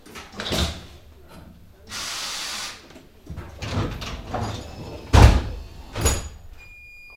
Bus Closing Door
We are inside a bus. Outside, a silent square, sunday morning. The driver let us record from the inside and closed the door for us
barcelona, bus, closing, door